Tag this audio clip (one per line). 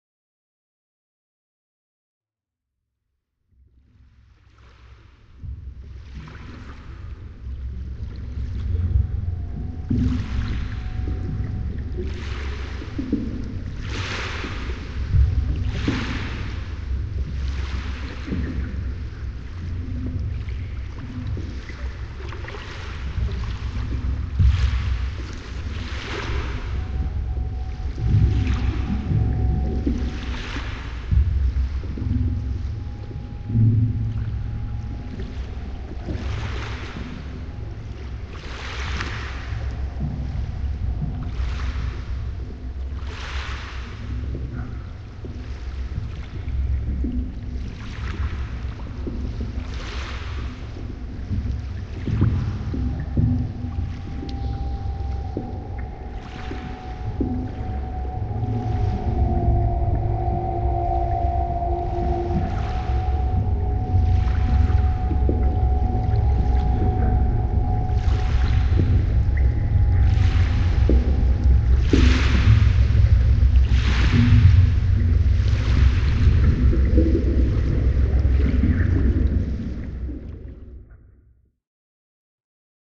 wind
water
atmosphere
field-recording
ambient
soundscape
ambience
weather
nature